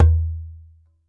DJEMBE LO KING
The djembe played by a really good musician: these are the little jewels of our studio!
djembe, mono, magoproduction, sample